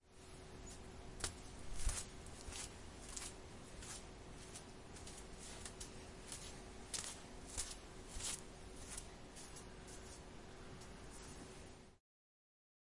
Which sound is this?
Me walking barefoot on tile.